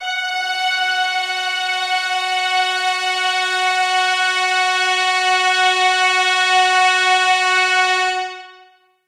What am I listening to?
Spook Orchestra [Instrument]